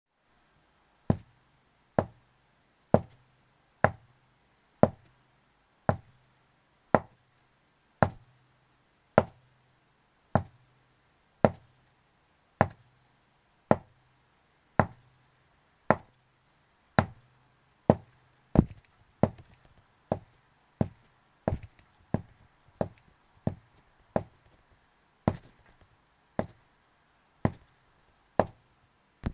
footsteps on wood-1
footsteps, wood